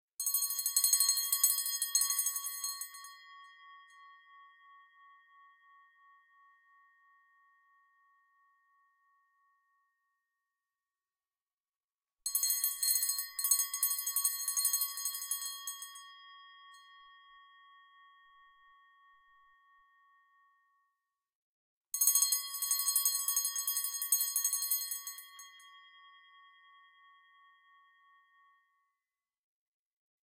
bell natural
Bell ring.
Recording made for play.
Hardware: Behringer B-1, M-Audio FastTrack Ultra, MacBook Pro
Software: Adobe Audition CS 6
No post processing
ringing, ring, tinkle, ding, bell, metal